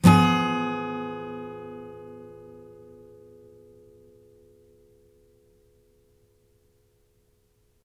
chord Ddim
Yamaha acoustic through USB microphone to laptop. Chords strummed with a metal pick. File name indicates chord.
chord, guitar, strummed